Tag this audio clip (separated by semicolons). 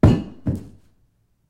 brick; brickle; bricks